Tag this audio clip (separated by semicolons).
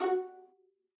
f4; vsco-2; violin; strings; violin-section